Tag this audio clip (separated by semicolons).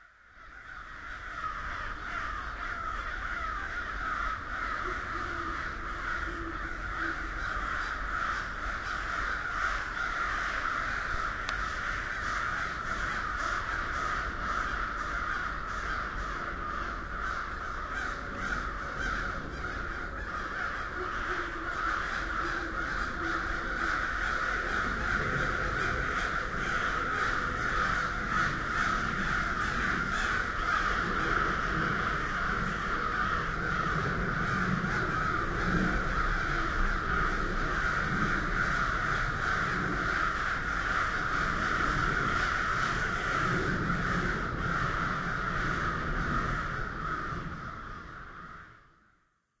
birds; crows; owl